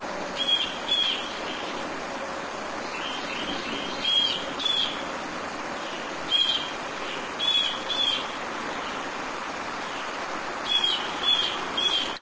various birds singing an hour after sunrise. recorded on a digital IC recorder. filtered to clean background noise using Cool Edit.